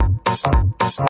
7 drumloops created with korg monotron @ recorded with ableton!
minimal, tech